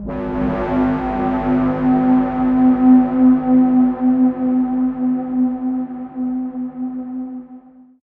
SteamPipe 9 Saturated Pad G#3
This sample is part of the "SteamPipe Multisample 9 Saturated Pad"
sample pack. It is a multisample to import into your favourite samples.
A beautiful dark ambient pad sound, with some saturation on the sound,
so it has a bit of an industrial character. In the sample pack there
are 16 samples evenly spread across 5 octaves (C1 till C6). The note in
the sample name (C, E or G#) does not indicate the pitch of the sound
but the key on my keyboard. he sound was created with the SteamPipe V3
ensemble from the user library of Reaktor. After that normalising and fades were applied within Cubase SX & Wavelab.
industrial, reaktor, multisample, pad, ambient